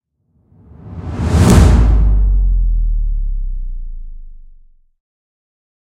once again i placed some microphones in my studio and recorded a hit on my couch and mixed a bassdrop to it to make the impact even more brutal. Useful for impacts of any kind